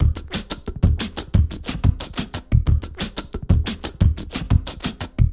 this is my mouth recorded about 7years ago. Number at end indicates tempo